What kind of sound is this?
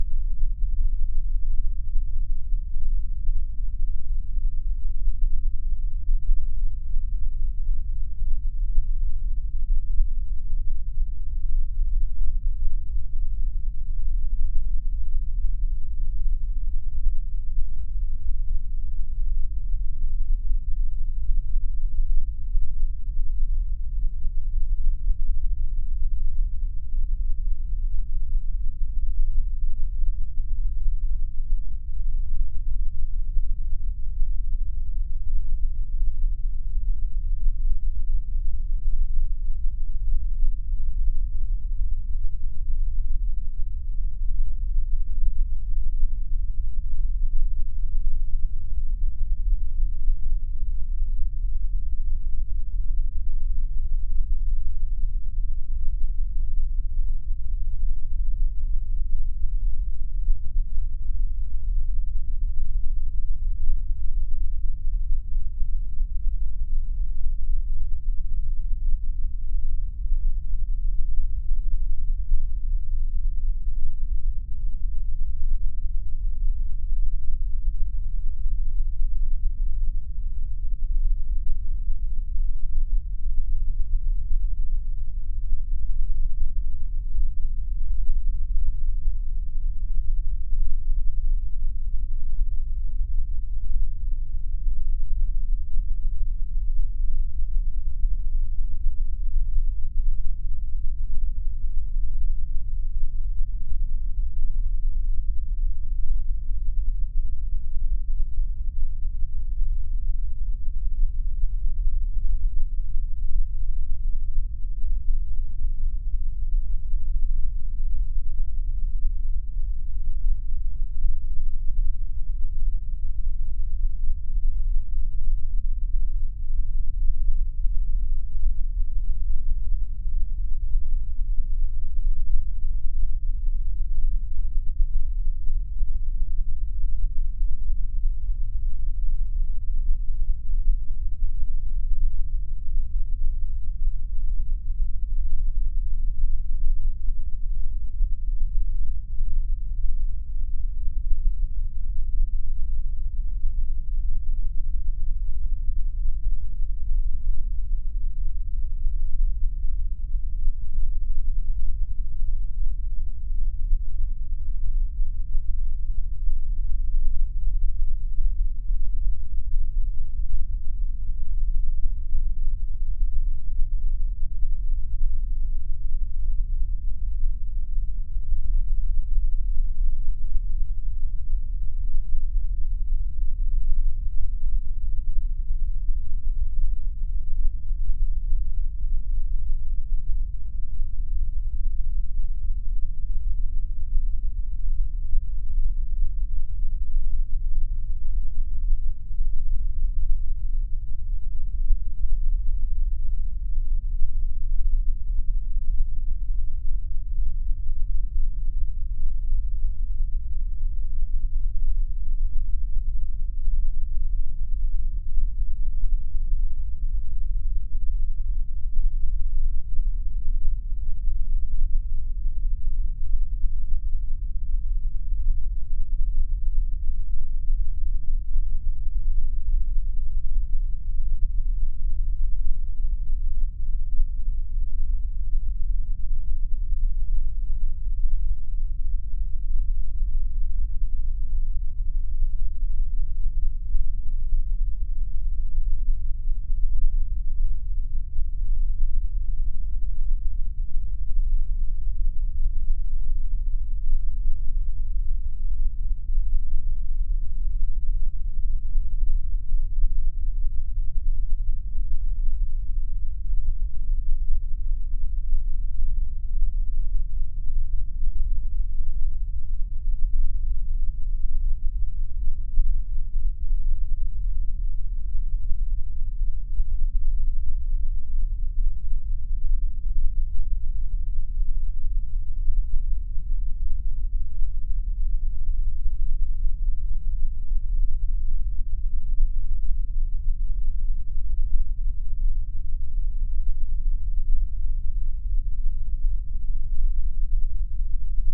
velvet infrared noise
infrared noise - low pass filtered: 18 dB/octave
band-limited, infrared-noise